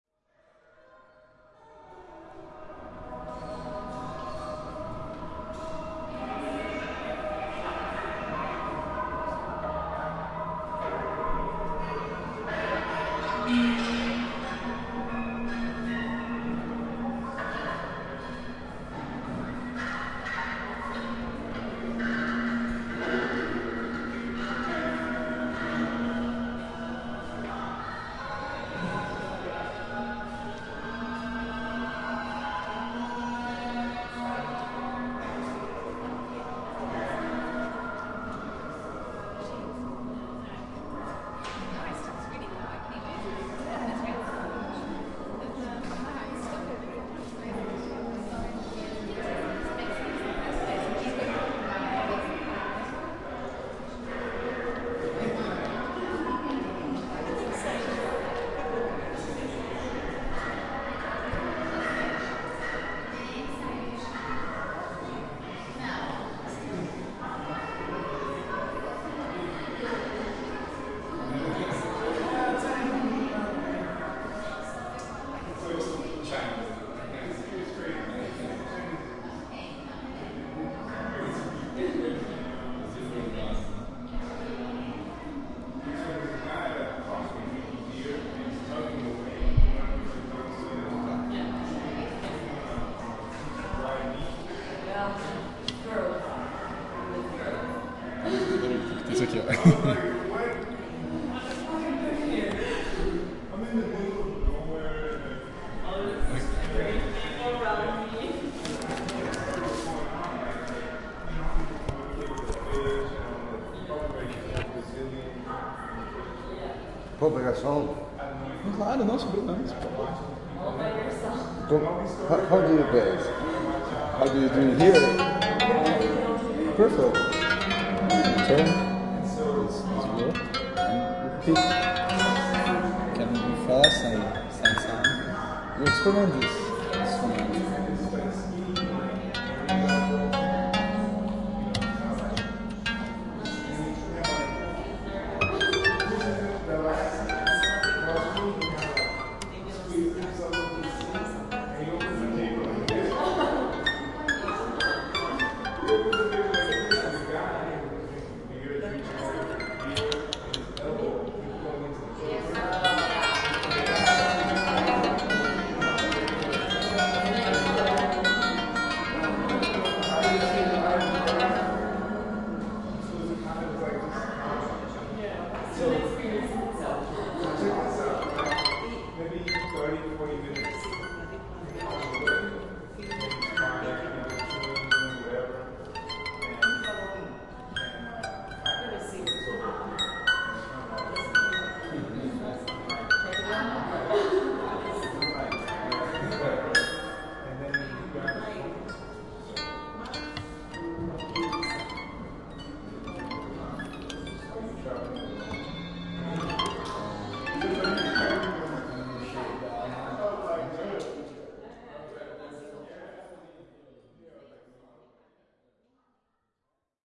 Bienal.SP.08.MaM.028
Smetak ambience at MaM.
sound, musical-instruments, field-recording